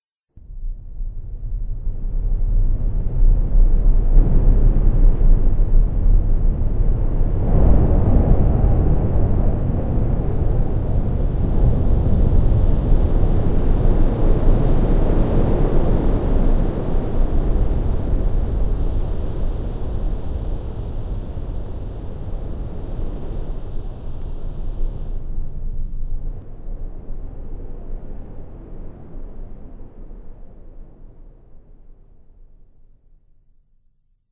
Custom ambiance sound. Made in Audacity
cloudy, whispy, blow, space, eerie, aftermath, suspence, rainy, ghost, somber, town, ambiance, shuttle, wind